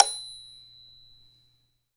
Multisamples of a toy xylophone (bells) recorded with a clip on condenser and an overhead B1 edited in wavosaur.